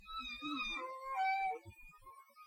leaning on my computer chair that desperately needs some WD-40.
creak, chair